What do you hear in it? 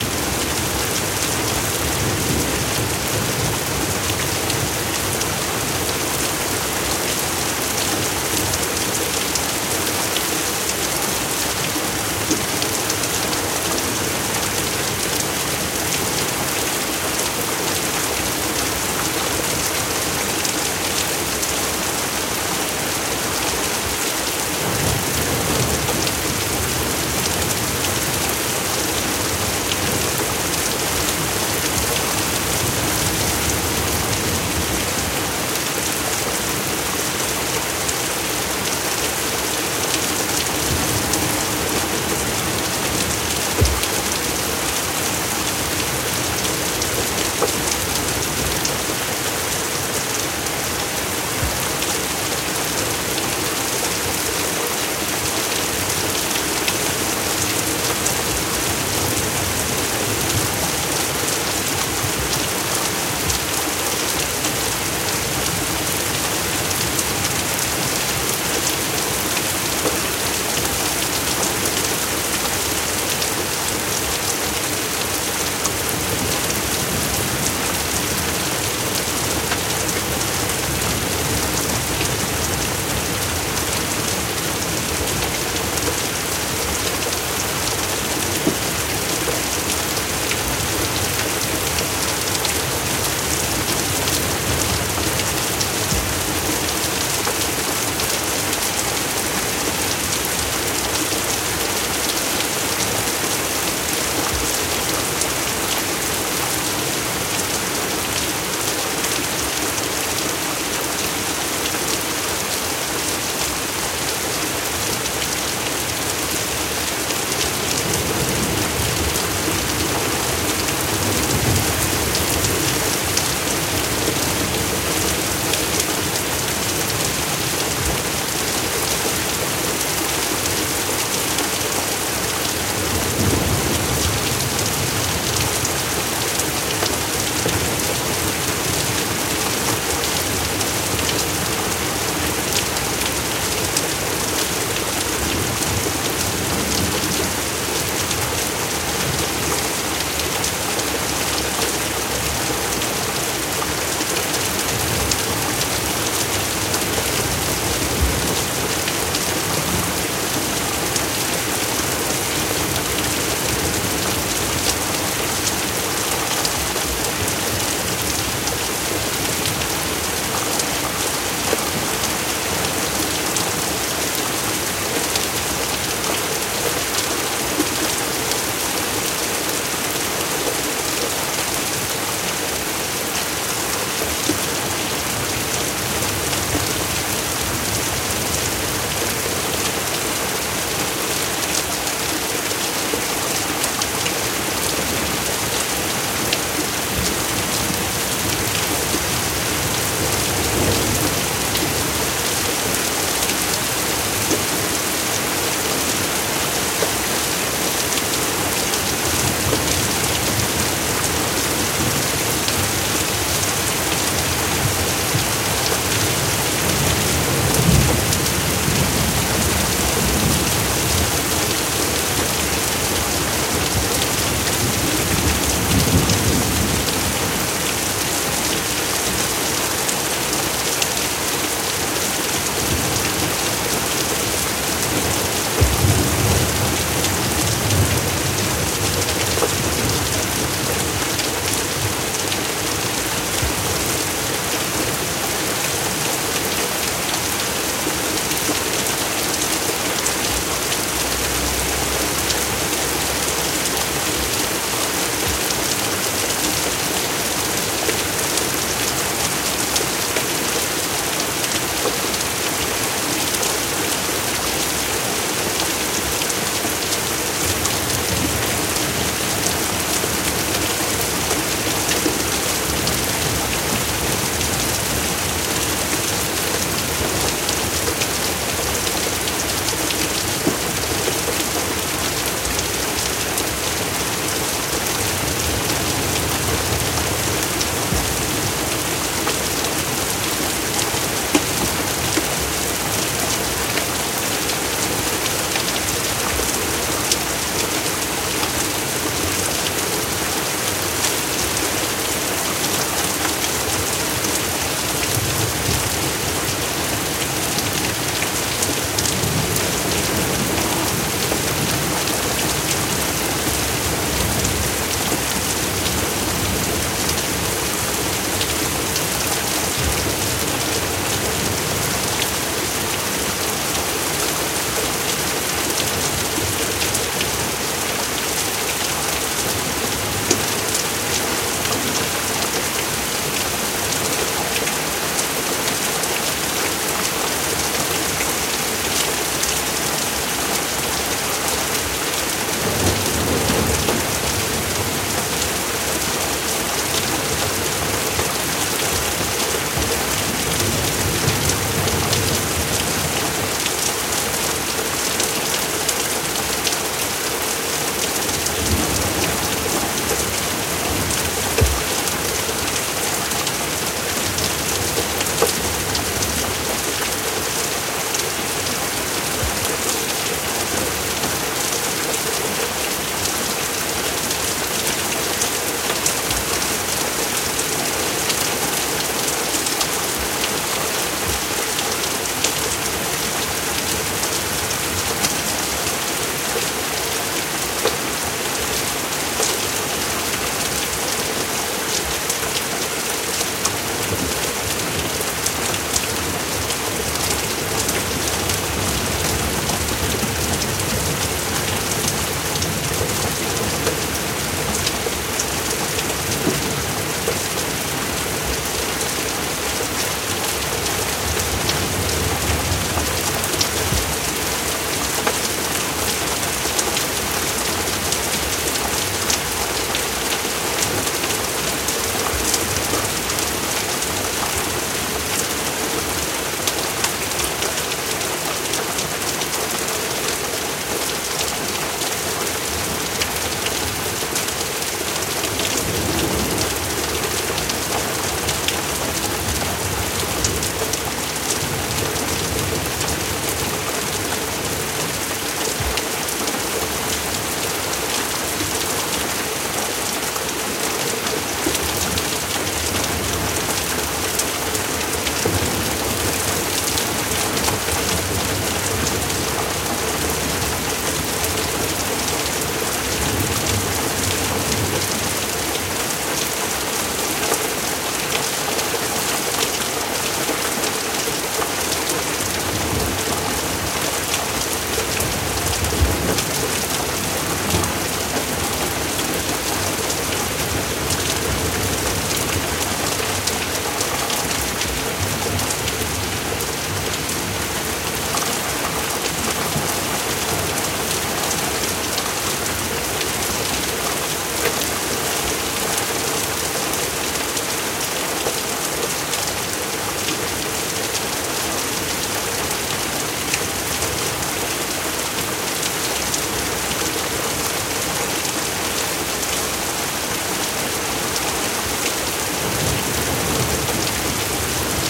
LOUD - Dual Mic'd - Heavy Rain from Grimbergen with Possible Thunder
A heavy downpour in Grimbergen, Belgium. There may be some thunder in there..
Heavy-Rain, Rain-Storm, Natural, Ambient, Thunder, Rain, Rainstorm, Nature, Loud, Heavy, Belgium, Grimbergen, Ambience, Storm